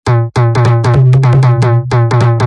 Im Weird
Very Weird Drum Playing.
drum, weird, dark